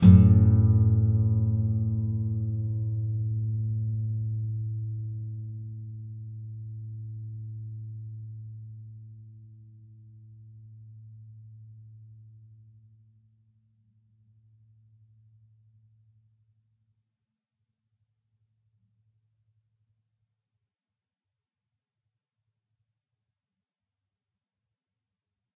F#min low 2strs
E (6th) string 2nd fret, and A (5th) string open. It is great for the run down from G Major to E minor; or up.
nylon-guitar, two-string-chords, chords